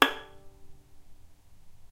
violin pizz non vib G#5
violin pizzicato "non vibrato"
violin, pizzicato, non-vibrato